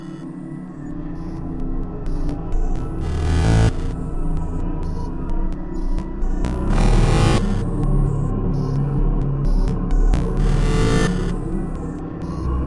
One in a small series of sounds that began as me making vocal sounds into a mic and making lots of layers and pitching and slowing and speeding the layers. In some of the sounds there are some glitchy rhythmic elements as well. Recorded with an AT2020 mic into an Apogee Duet and manipulated with Gleetchlab.
spooky, glitch, creepy, dark, eerie, voice, vocal, echo, singing